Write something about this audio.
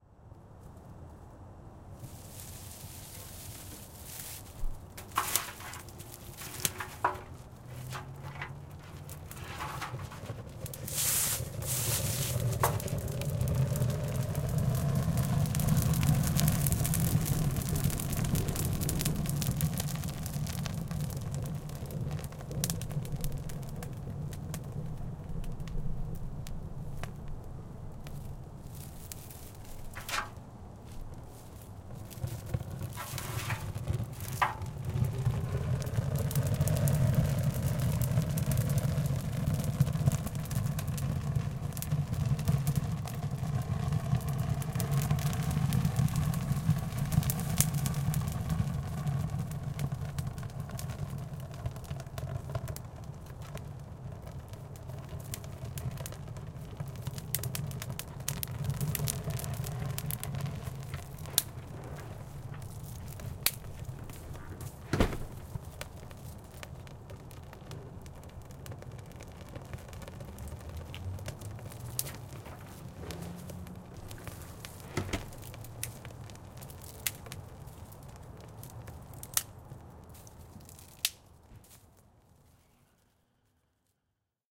Chiminea Fire
Burning dry pine needles in a ceramic chiminea. highway noise in background. Door opens and closes at end of recording. Recorded with a Rode Nt-4 microphone, Sound Devices MixPre preamp into a Sony Hi-Md recorder.
sound-effect, chiminea, fire, foley, burning